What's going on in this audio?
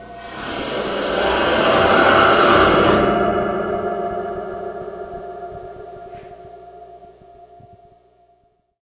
CD STAND OF DOOM 070
The CD stand is approximately 5'6" / 167cm tall and made of angled sheet metal with horizontal slots all the way up for holding the discs. As such it has an amazing resonance which we have frequently employed as an impromptu reverb. The source was captured with a contact mic (made from an old Audio Technica wireless headset) through the NPNG preamp and into Pro Tools via Frontier Design Group converters. Final edits were performed in Cool Edit Pro. The objects used included hands, a mobile 'phone vibrating alert, a ping-pong ball, a pocket knife, plastic cups and others. These sounds are psychedelic, bizarre, unearthly tones with a certain dreamlike quality. Are they roaring monsters or an old ship breaking up as it sinks? Industrial impacts or a grand piano in agony? You decide! Maybe use them as the strangest impulse-responses ever.